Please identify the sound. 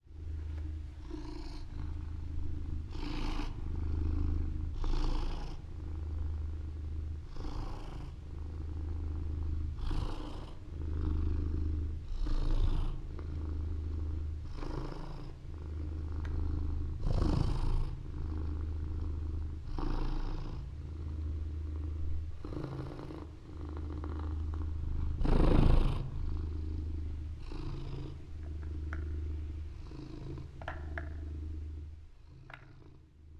purr fusa
My cat purrs. Recorded with R-09. We were in a very small room. Some noise, you can filter it.
cat, fusa, purr